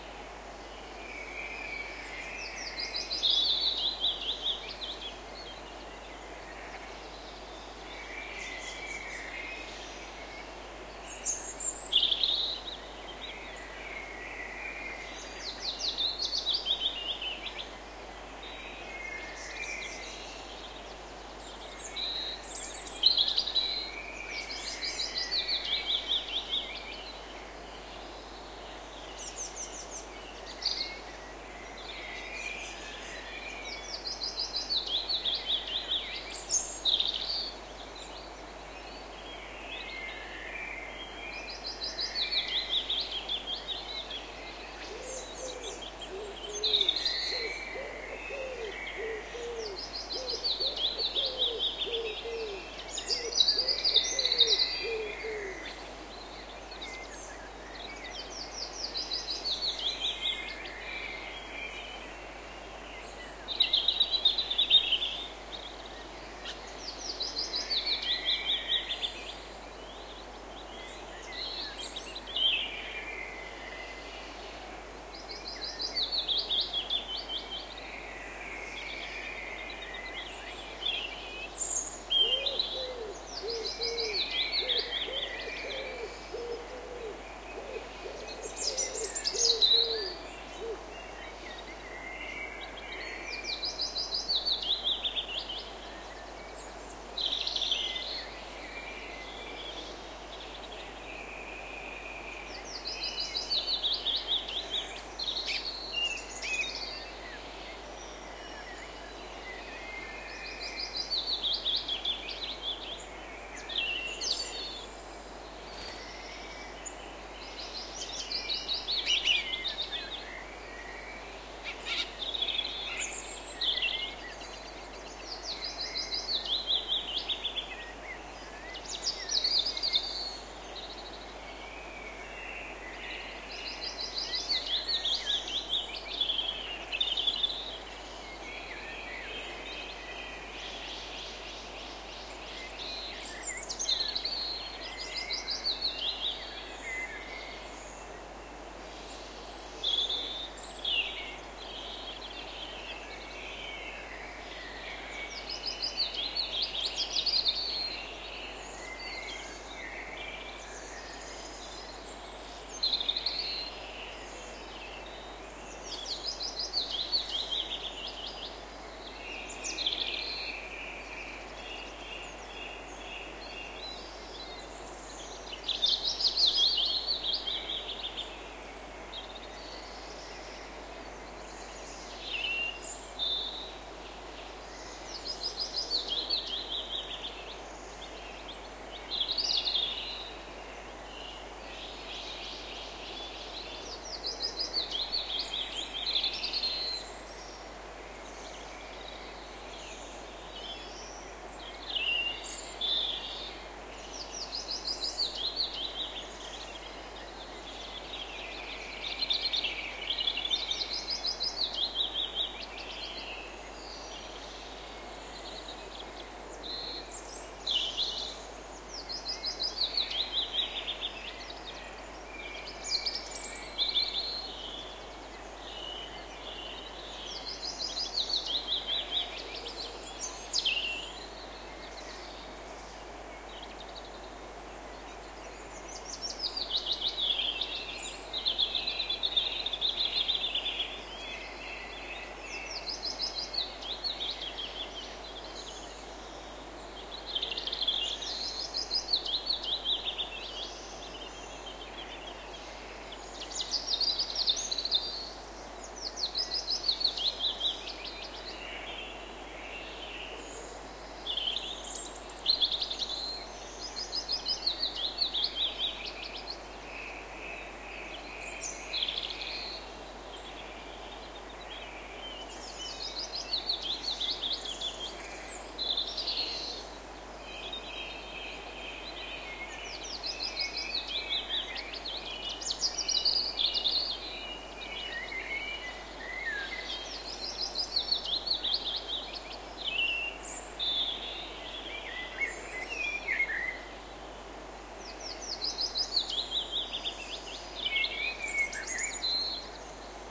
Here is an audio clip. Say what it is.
This recording was done on the 31st of May 1999 on Drummond Hill, Perthshire, Scotland, starting at 4 am, using the Sennheiser MKE 66 plus a Sony TCD-D7 DAT recorder with the SBM-1 device.
It was a sunny morning.
This is track 5.
If you download all of these tracks in the right order, you are able to burn a very relaxing CD.